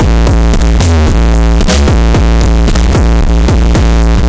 standard techno drum loop generated using Fruity Loops. filtered, cleaned and/or altered using Cool Edit. loud on the low end- bass heavy.